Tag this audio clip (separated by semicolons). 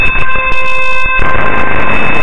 electronic; fubar; noise; processed